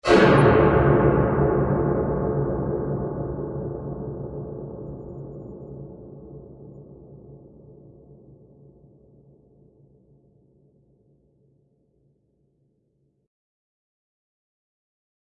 s betrayed oven
Musical string pluck in reverberant space.
note, string, musical, reverberant, music, strings